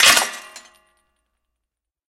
Broken Metallic
Breaking a piece of handmade rejected pottery into a large aluminum brewing pot.
shatter, break, pottery, crack, chains, clatter, glass, smash, shards, grinding, breaking, crash, trash, crumble